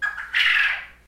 Sherry - Morning Call - g#
My bird makes this sound in the morning usually it means Sherry wants food, today she was quite hungry. The sound is g# according to audacity. She makes some other sounds but I'm not uploading them just yet.
Basement, Quale, Forest, Nature, Animals, Sounds, Friend